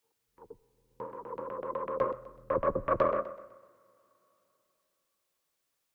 Whoosh StutterMuted ER SFX 15
air, chop, chopped, choppy, long, soft, stutter, swish, swoosh, swosh, transition, whoosh, woosh